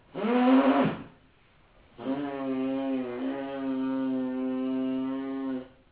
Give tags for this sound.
blowing
raspberry
strawberry

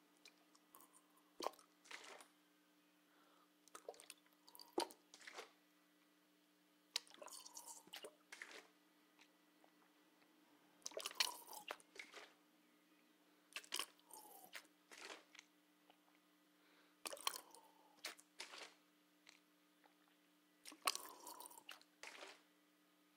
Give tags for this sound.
squeeze
water

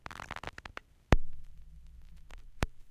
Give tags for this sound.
record
crackle
vinyl
warm
static
pop
warmth
dust
turntable
hiss
noise

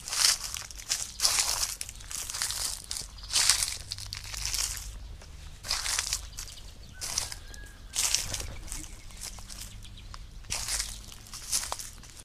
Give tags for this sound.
dry
field-recording
leaves
orchard
summer

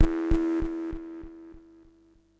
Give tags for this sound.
electronic
synth
waldorf
multi-sample
100bpm

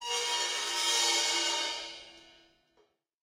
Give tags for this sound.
ambient
msic
noise

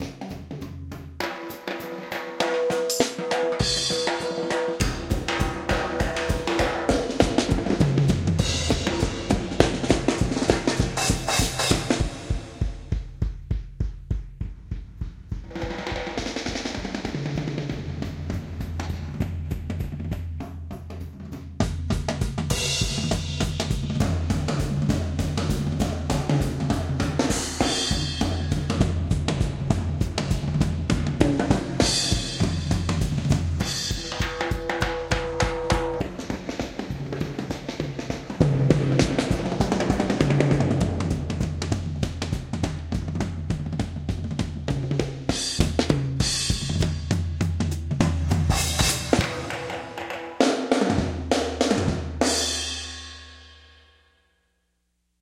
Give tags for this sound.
Binaural
chamber
Drums
recording

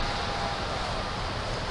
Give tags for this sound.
field-recording
party

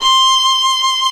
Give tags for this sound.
keman
violin
arco

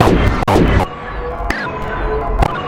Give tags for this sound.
digital
random

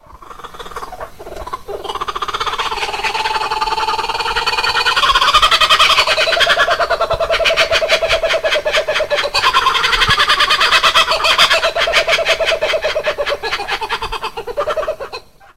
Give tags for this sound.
bird
call
field-recording
kookaburra

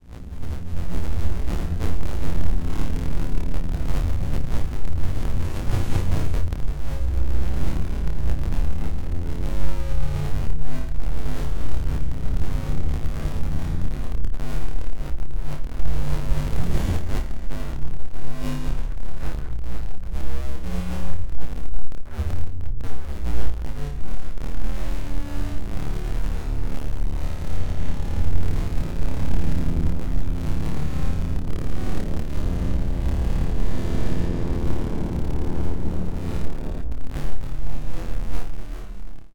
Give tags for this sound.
abstract
dark
distorted
drone
electric
electronic
future
glitchy
noise
panning
processed
pulsing
sfx
sound-design
static

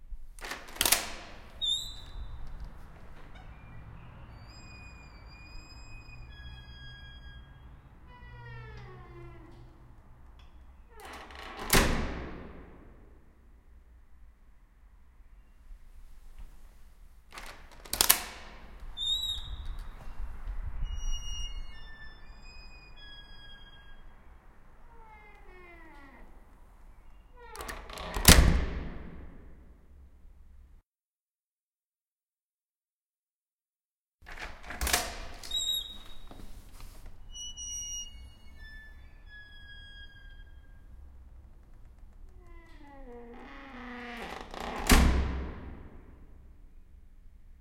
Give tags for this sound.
creak
creaking
door
slam
slamming

